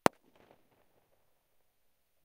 Date: ~12.2015 & ~12.2016
Details:
Recorded loudest firecrackers & fireworks I have ever heard, a bit too close. Surrounded by "Paneláks" (google it) creating very nice echo.
Bang, Boom, Explosion, Firecrackers, Fireworks, Loud